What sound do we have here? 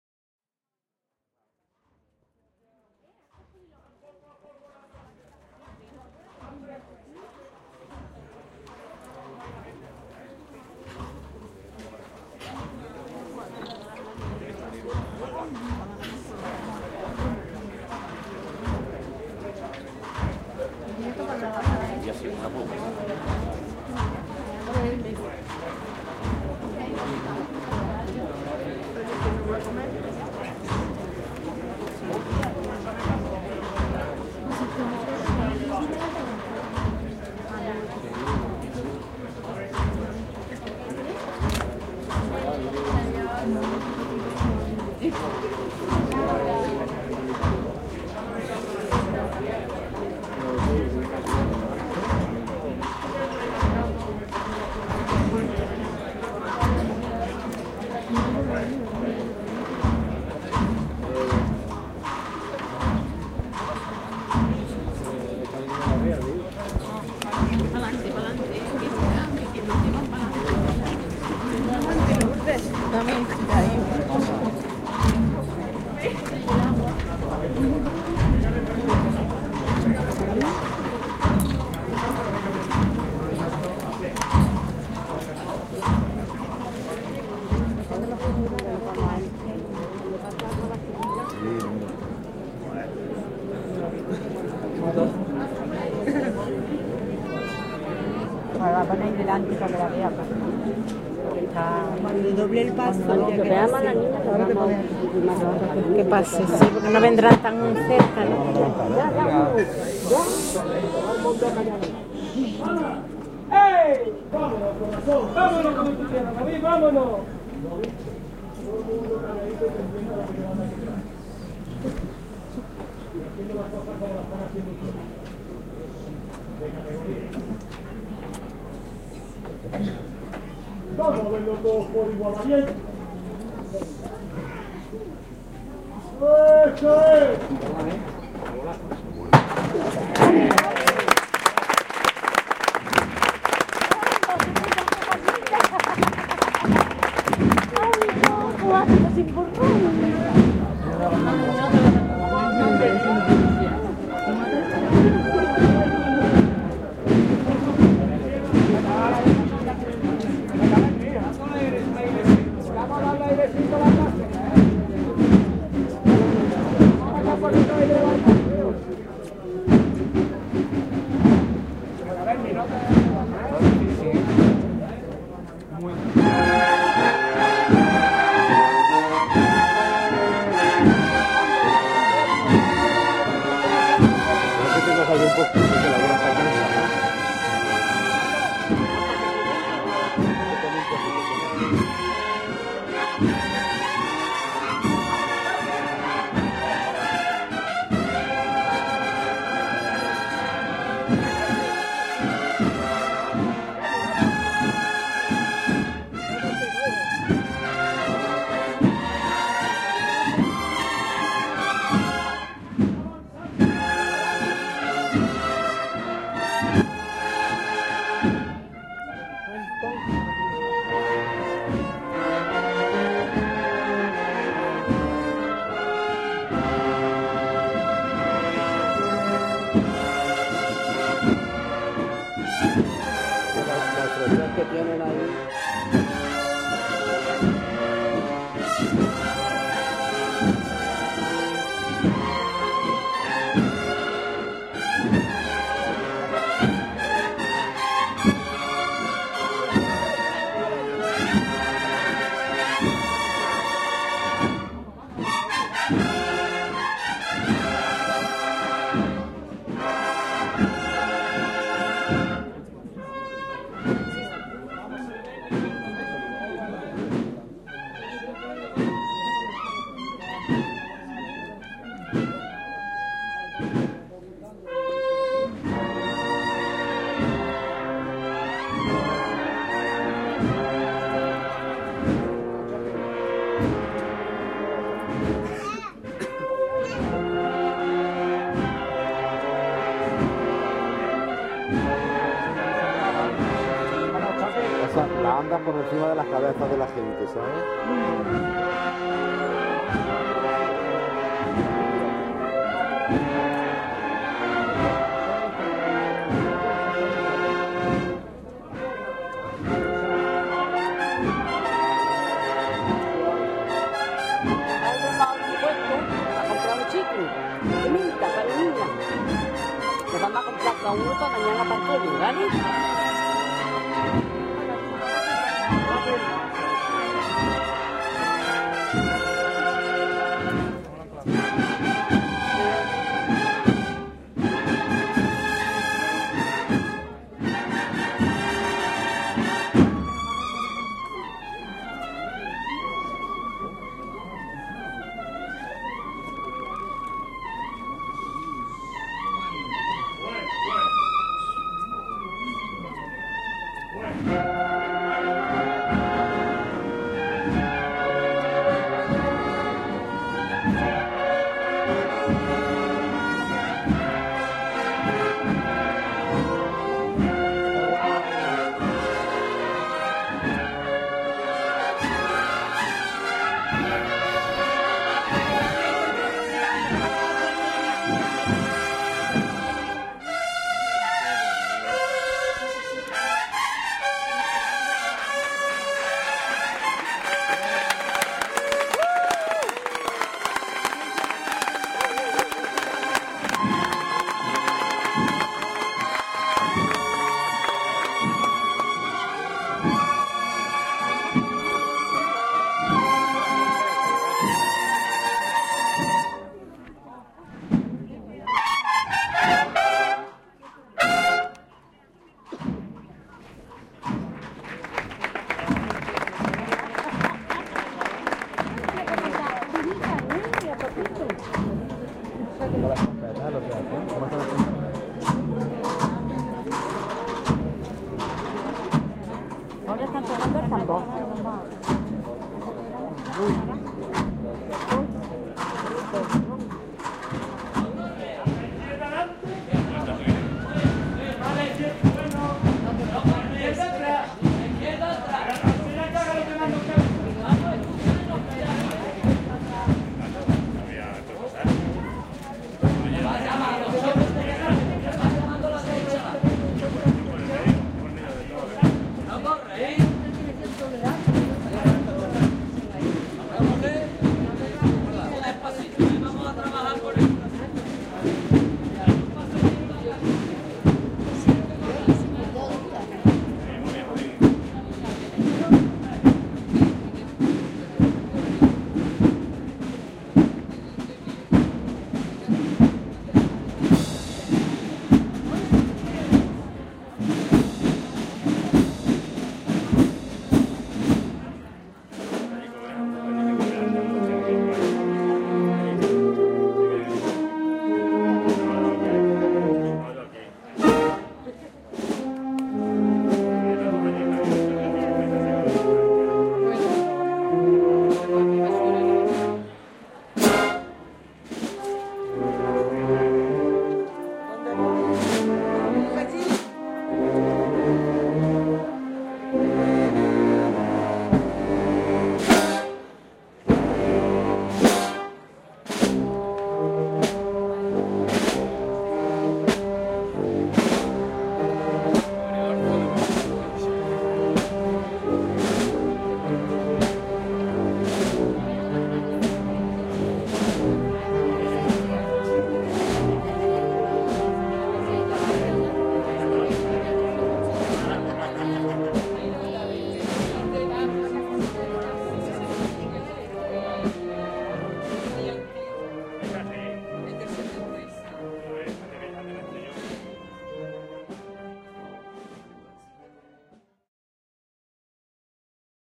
Recorded here (during Semana Santa)are the sounds of a large float (pasos) being maneuvered through a tight corner in the small, twisted streets of old Cordoba, Spain.
"Holy Week (Spanish: Semana Santa) is one of the most important traditional events of the city. It is celebrated in the week leading up to Easter, and is one of the better known religious events within Spain. This week features the procession of pasos, floats of lifelike wooden sculptures of individual scenes of the events of the Passion. Some of the sculptures are of great antiquity and are considered artistic masterpieces, as well as being culturally and spiritually important to the local Catholic population. The processions are organized by hermandades and cofradías, religious brotherhoods. During the processions, members precede the pasos (of which there are up to three in each procession) dressed in penitential robes, and, with few exceptions, hoods. They may also be accompanied by brass bands." wiki-p